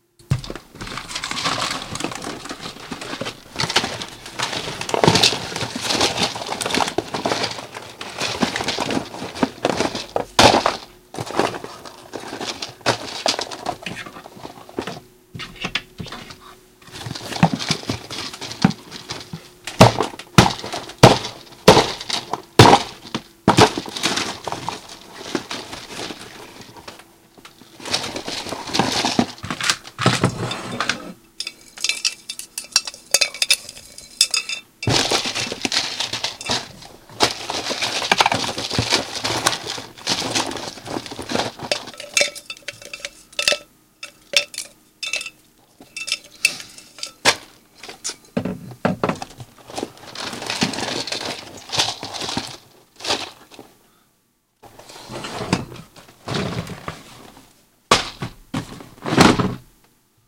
frantic searching
This is the foley sound of someone frantically searching for something in drawers and cupboard as if in a panic or robbery. Recorded with a Shure SM58 and a Marantz digital recorder.